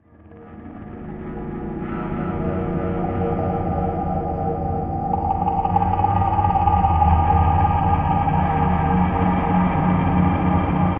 abyss pad
A underwater feel dark effect pad
dark, tech